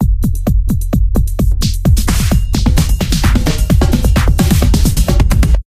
where you go
rap, sample, disko, sound, beat, lied, dance, song, hop, RB, hip